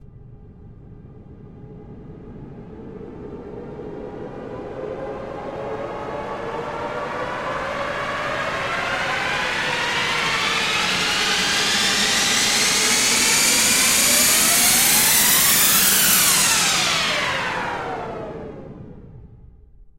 Sweep (Flanging)
A white noise sweep, put through a flanger.
Riser, Sweep, Whoosh